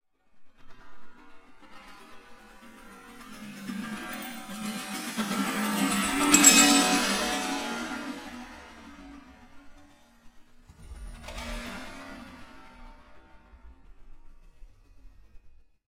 The sound of trash sloshing around a metal trash can time stretched to an extreme degree. Some nice industrial sounds result, or maybe it's a menacing robot warrior from the future.